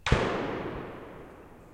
Distant gunfire 02
Distant gunfire. Not suitable for close-range shots, but could work as well as distant shots or even explosions.
gun, distant, blast, bullet, gunfire, pow, crack, boom, fire, shoot